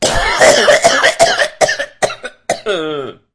delphis COUGH 1644 H4
My first take with the Zoom H4. My own voice (cough) because smoking a lot of cigarettes the sounds becomes a little bit dirty!
cough, dirty, h4, human, male, natural, smoke, zoom